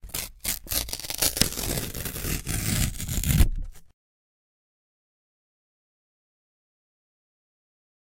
04 Tehoste paperinrepiminen
A single tear of a piece of paper
paper, tear